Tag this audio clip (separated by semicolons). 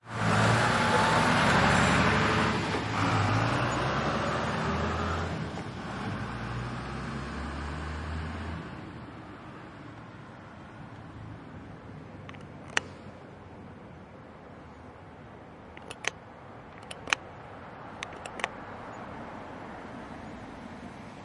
Japan; Tokyo; ambiance; ambience; ambient; atmosphere; cars; city; city-noise; evening; exploring; field-recording; japanese; night; street; tourism; traffic; urban; walking